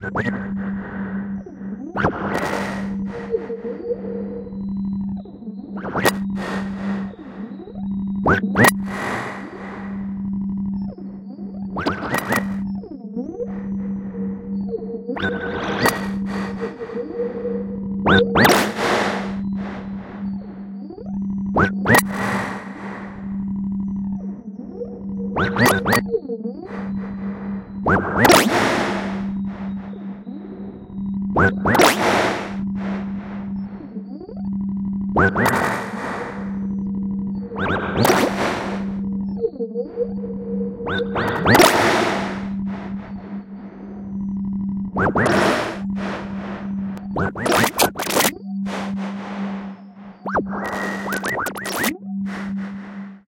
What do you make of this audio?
starObject Resostan
Careless asteroid whispers in the dark (of space).